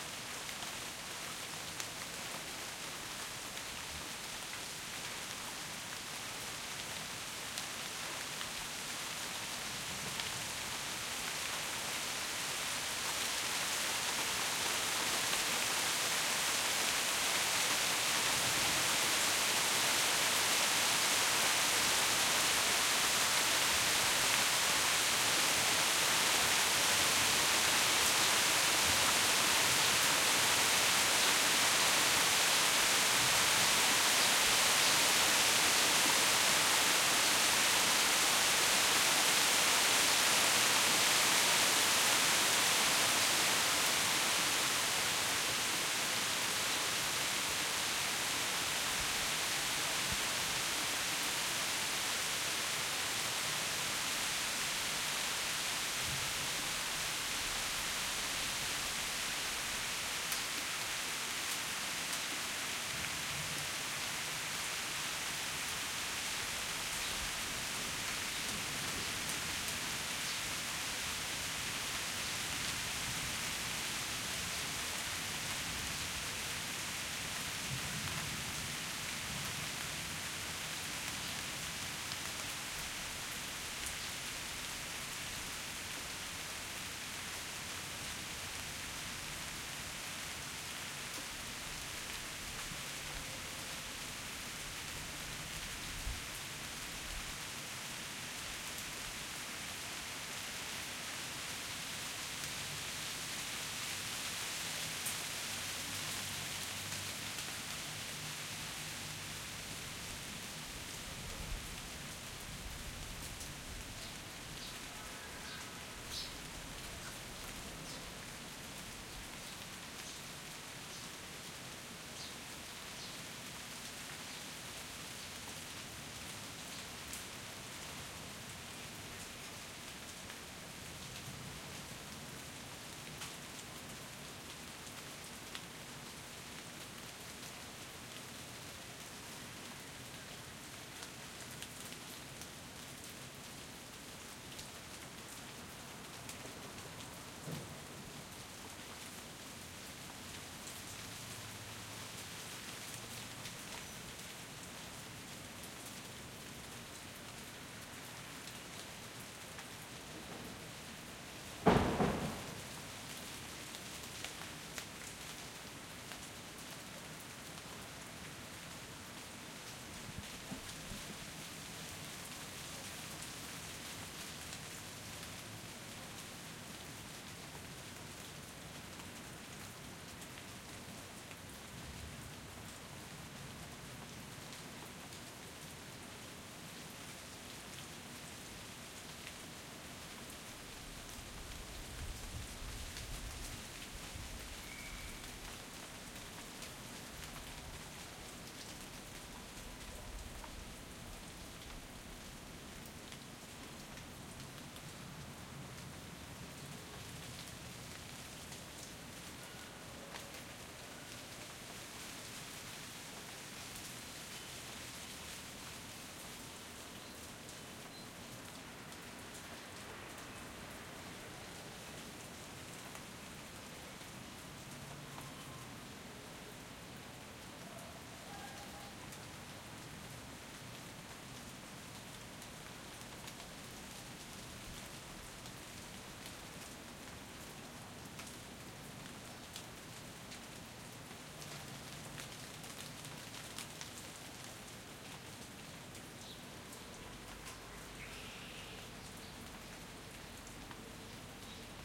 rain light porch last wave and pass over +wet country rural after storm Canada
after, country, last, light, over, pass, porch, rain, rural, storm, wave, wet